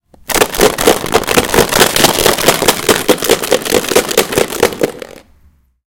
mySound TBB Atila
Sounds from objects that are beloved to the participant pupils at the Toverberg school, Ghent
The source of the sounds has to be guessed, enjoy.
cityrings, toverberg, belgium